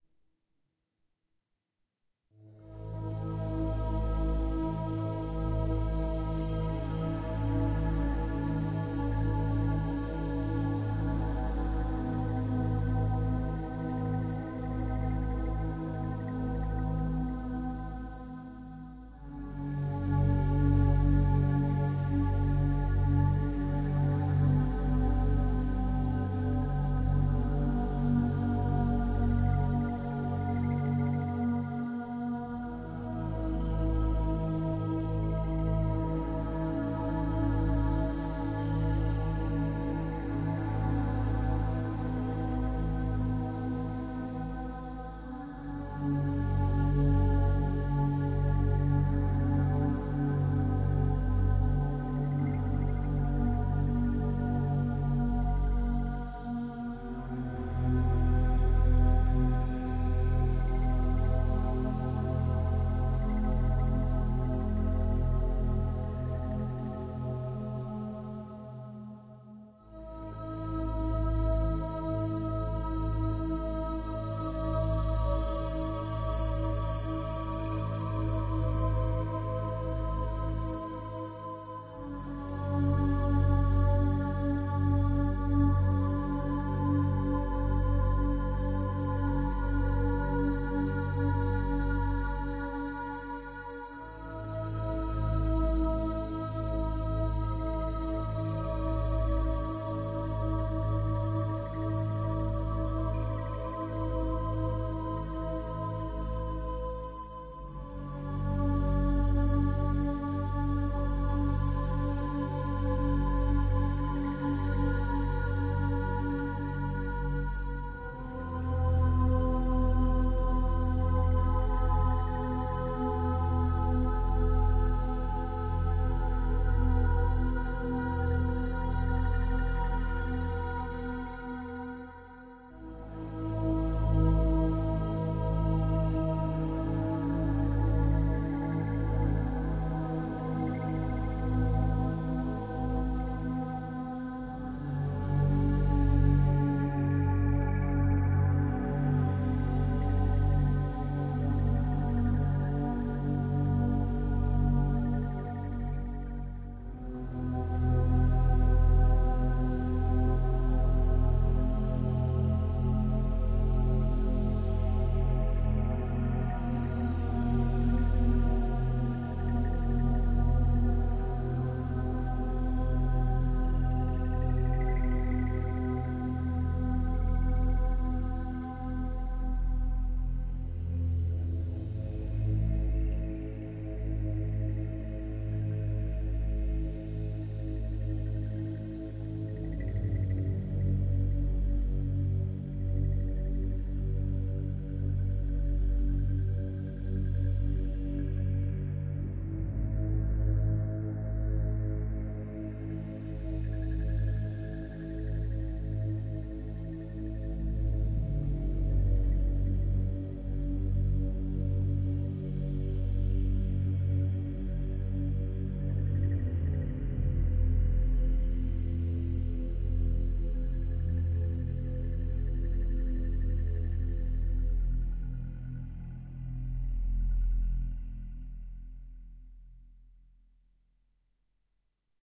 relaxation music #31
Relaxation Music for multiple purposes created by using a synthesizer and recorded with Magix studio.